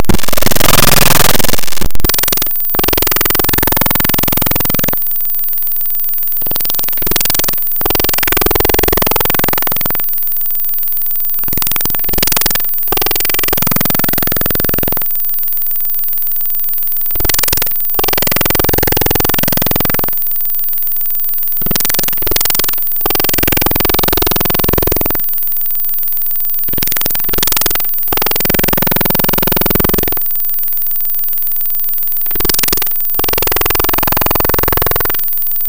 Headphone users please turn your volume down! The sound you get is a random screen capture of a short e-mail message. Imported raw into audacity.